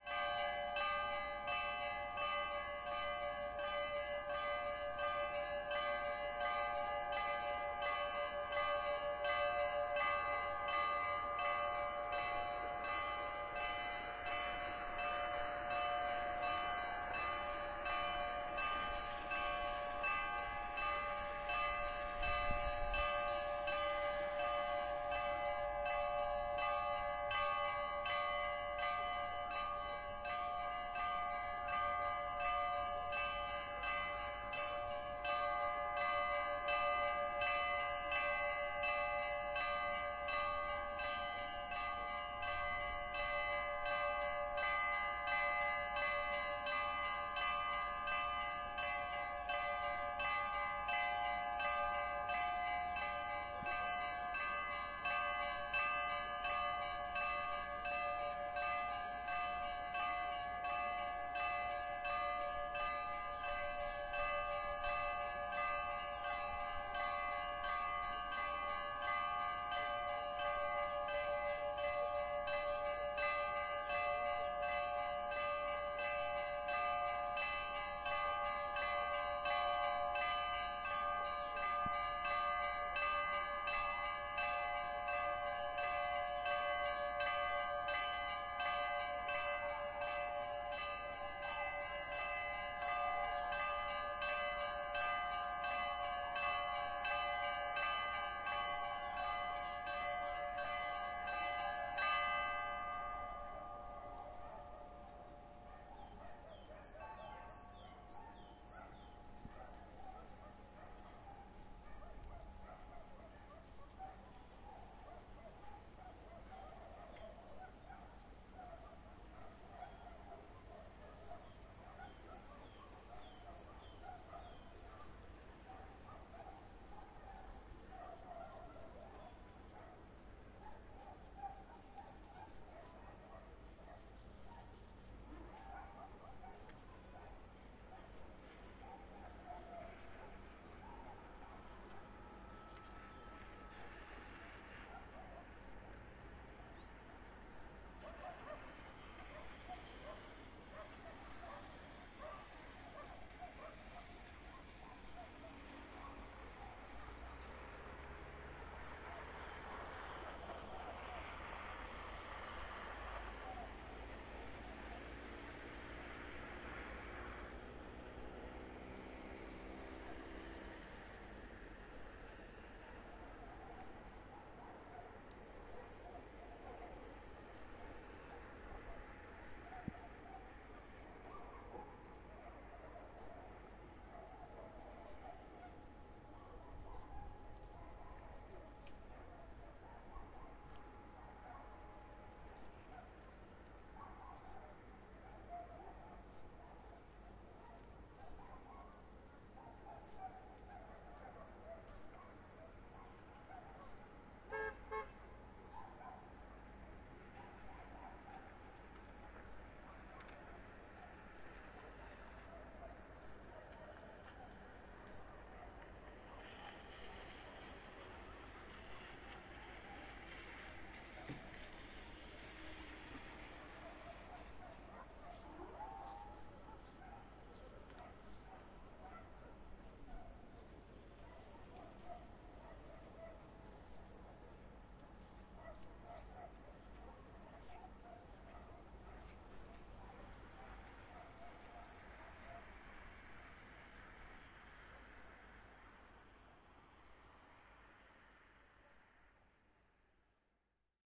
Ext, church bell
church bell, the atmosphere of the village. Transport and dogs at long range.
bell, church